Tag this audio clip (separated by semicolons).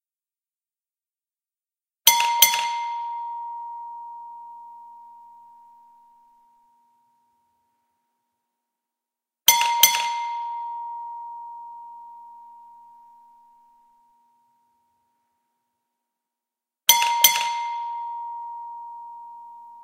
automotive; bell; car; ding; fuel; gas-station; gas-station-bell; petrol